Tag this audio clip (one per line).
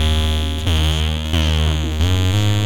beat
distorted
drums
harsh
heavy
like
metal
ni
processed
remixes
stickman
treated